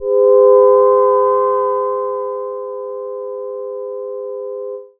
minimoog vibrating B-4
Short Minimoog slowly vibrating pad